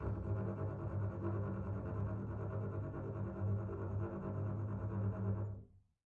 One-shot from Versilian Studios Chamber Orchestra 2: Community Edition sampling project.
Instrument family: Strings
Instrument: Solo Contrabass
Articulation: tremolo
Note: F#1
Midi note: 31
Midi velocity (center): 31
Microphone: 2x Rode NT1-A spaced pair, 1 AKG D112 close
Performer: Brittany Karlson